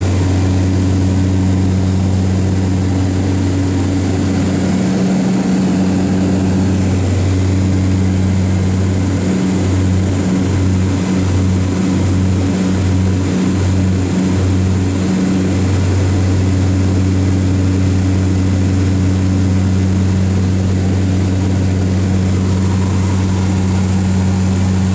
Propellor plane indoors ambience 2
Recorded inside a propellor plane when we were above the clouds. Sound is slightly differen that ambience 1.
clouds, Propellor, ambience, plane, indoors, above